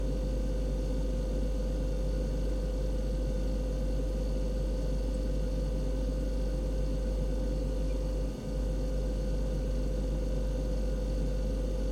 Foley Object Fridge Motor Humming Loop Mono NTG4
Humming - Fridge Sound (Loop).
Gear : Rode NTG4+
hum, domestic